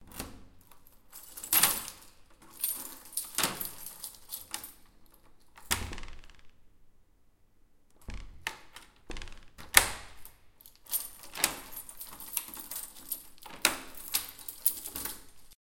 PUERTA ABRE Y CIERRA CON LLAVE
reinforced door close and open with keys
Una puerta se cierra con llave, suena el juego de llaves girando alrededor de la ceradura
puerta, cierra, llaves, cerradura, cerrar